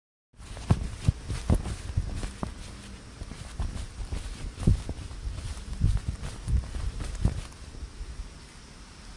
Cloth Flapping
A realistic clothing noise; this sound could be used for someone walking/running, and their clothes are flapping or rubbing together.
walking, clothes, wind, flap, clothing, movement, sail, fabric, pants, swish, shirt, material, rustle, rustling, cloth